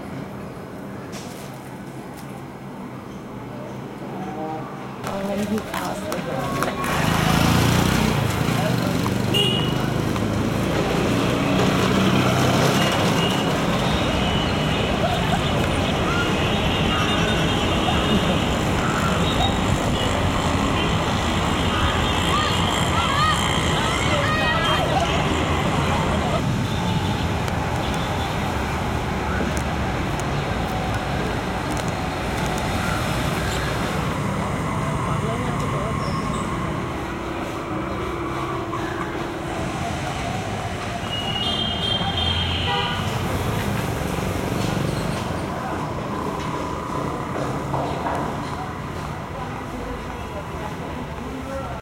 India, Streets of Bangalore City. You hear the usual Indian traffic with buses, cars, tuktuks, pedestrians, some voices, and sometimes wind noise.